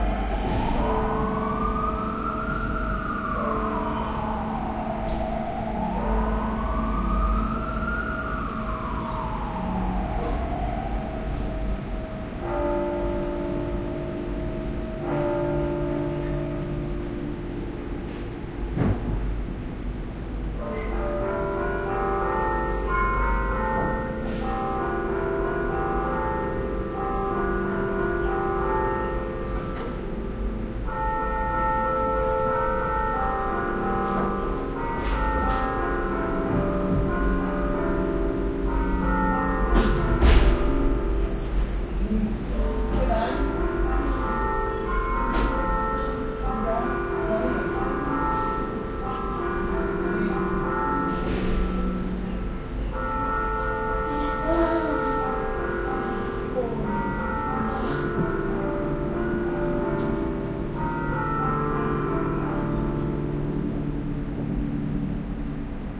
Recording of the 14h bell ring of Sagrada Familia church in Barcelona. Recorded at a bedroom in the 6th floor of a building close to the cathedral at April 25th 2008, using a pair of Sennheiser ME66 microphones in a Tascam DAT recorder, using a XY figure.